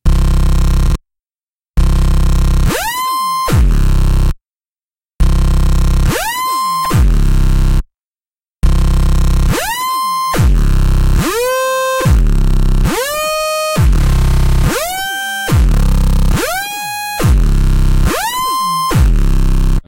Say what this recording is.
A short synth phrase created with a custom patch in a Blofeld synth and processed with Ozone for maximum volume and distortion-ness.
nasty, CPEM, dubstep, synth, electro, distorted